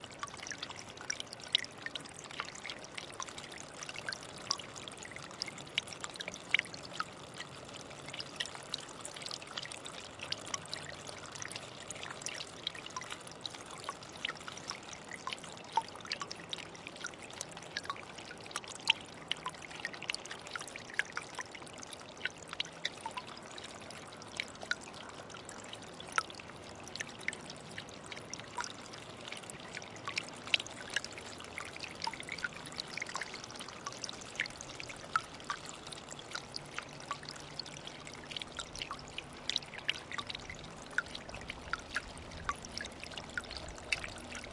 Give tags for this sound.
forest
mountain
rain
spring
trickle
water

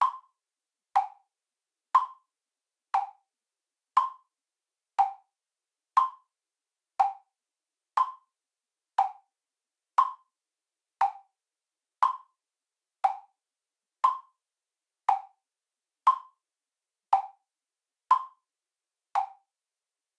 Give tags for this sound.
clock
tac
tic
tic-tac
tick
tick-tock
ticking

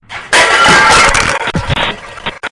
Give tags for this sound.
bad
crash
messed-up
smash

